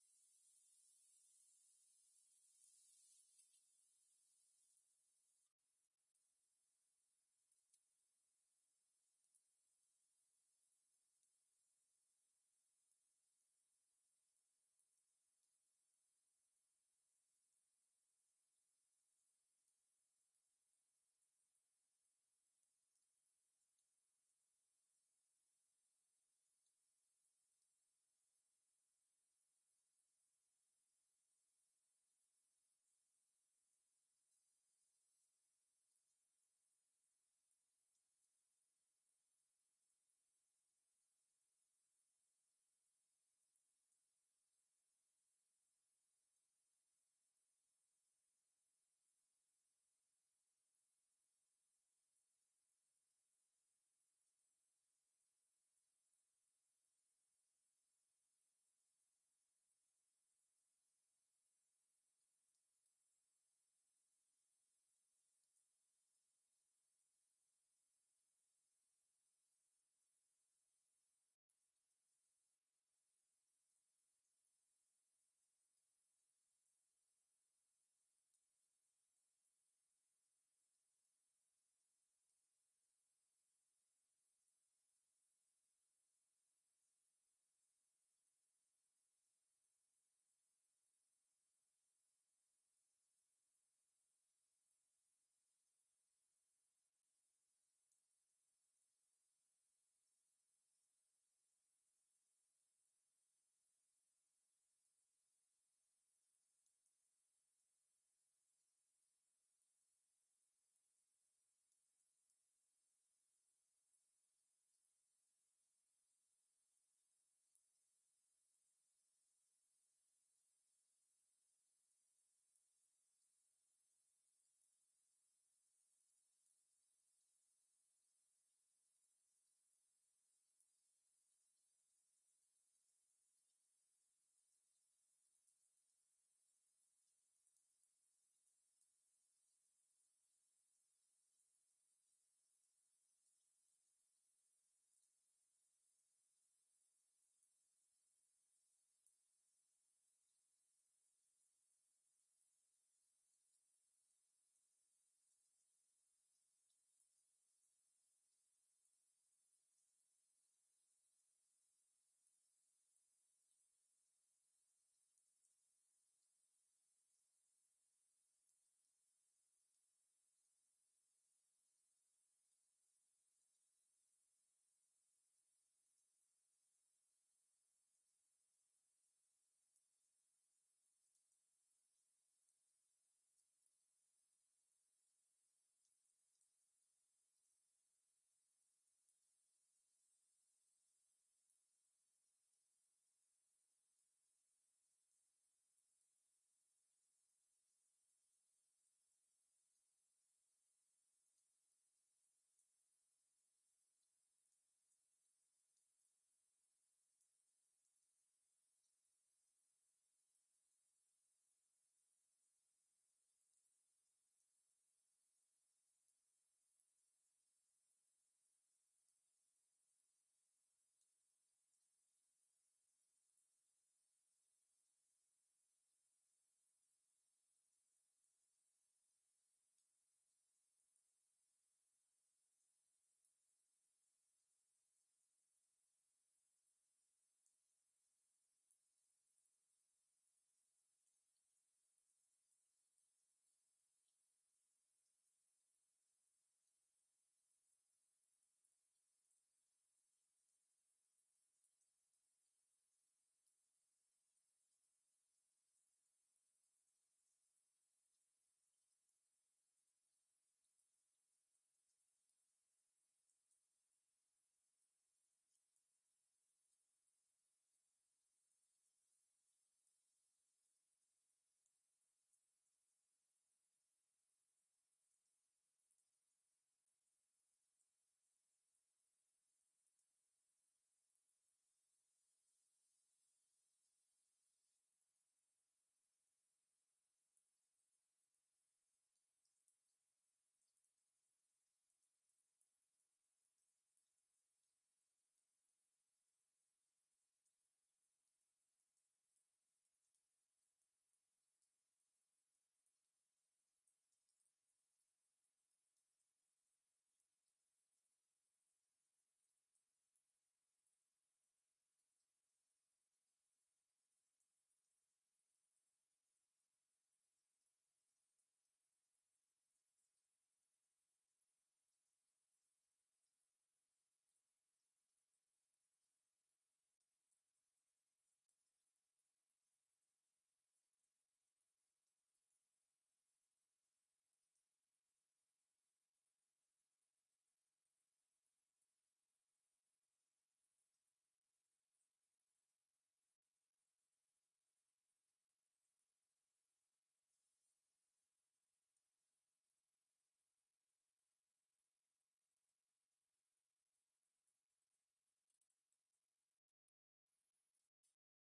Rain Slowly Passing SIDE ONLY Edgewater 06192020
I recorded some rain outside my front door. This is a horrific abomination though. I just took all of the "mid" information out of the clip, so you're only left with the "side" and honestly, it kind of make my head hurt, but it sounds cool side-chained to a kick.
Please link people here to share rather than redistributing yourself.
I'd love to hear what you do with it.
white-noise, high, field-recording, soundscape, ambience, side, noise, rain, background-sound, stereo, painful, whitenoise, atmosphere, atmo, water, background, nature